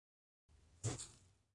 27.Deja caer la prótesis
brazo cayendo en la nieve
college, recording, study